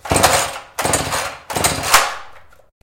Chainsaw Start
Starting of a chainsaw